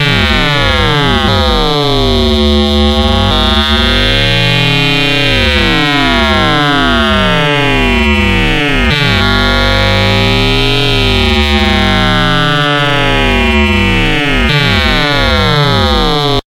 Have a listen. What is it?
quantum radio snap085
Experimental QM synthesis resulting sound.
noise, soundeffect, drone, sci-fi